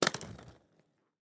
Skate-concrete 3
Concrete-floor, Foleys, Rollerskates